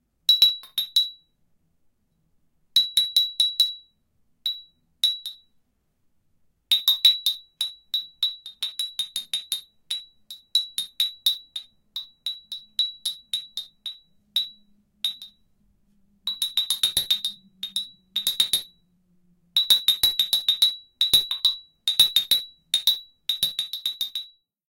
Stereo Recording of a small bell
Bell, Ding, Ring, small-bell, Ting